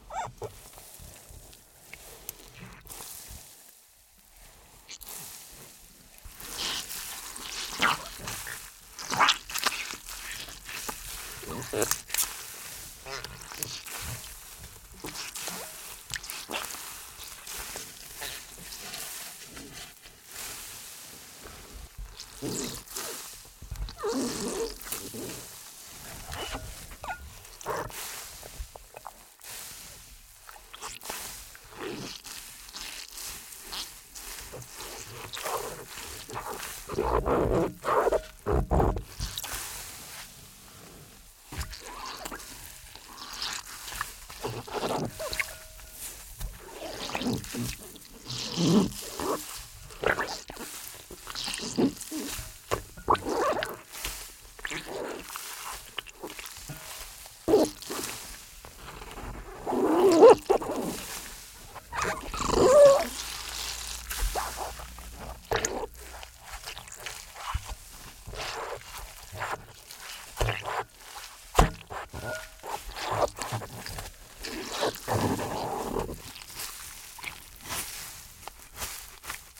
20180429 Squeezing a big, wet sponge
gurgle, monster, onesoundperday2018, slime, sponge, squash, vocal-like, water, wet